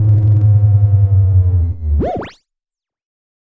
Attack Zound-15
Similar to "Attack Zound-05" but with a long decay and a strange sound effect at the end of the decay. This sound was created using the Waldorf Attack VSTi within Cubase SX.
soundeffect, electronic